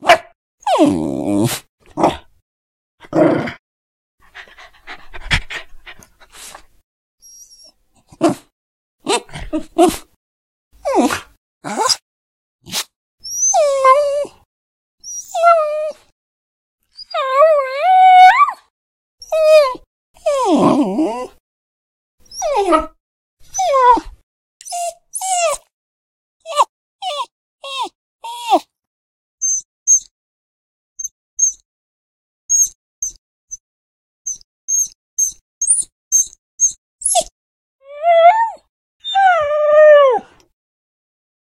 A variety of dog sounds which have been edited into a single file. Silence was left between each sound so they should be easily edited.
Sounds included could variously be described as bark, whine, growl, snort, sniff, whistle, yawn, dog, ruff etc.
The dog performer, a terrier mix called Dill, was quite an expressive dog. Sadly no longer with us.
dog, growl, ruff, snort, whine, whistle, yawn